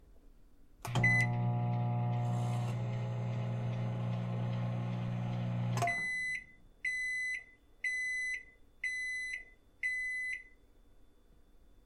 sounds of a microwave
beep, machine, microwave